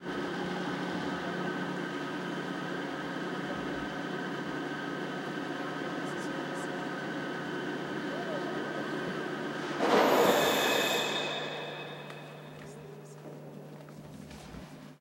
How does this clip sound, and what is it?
Recorded with mini-DV camcorder and Sennheiser MKE 300 directional electret condenser mic.